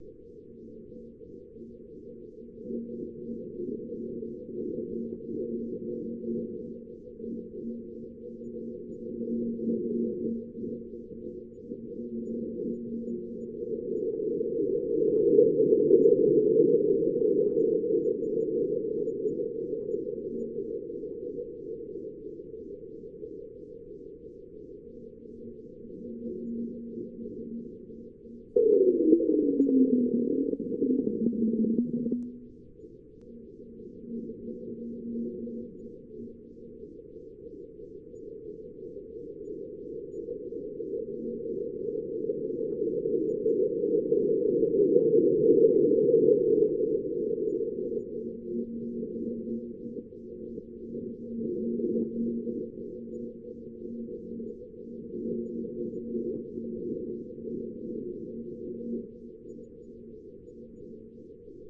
Comprised of a Marsquake heard by NASA's InSight probe, modified to make it loop seamlessly, amplified cause it was too quiet and added a Wahwah effect to give a throbbing sound like the planet is alive.
Alien, Distant, Looping, Mars, Quake, Wind, World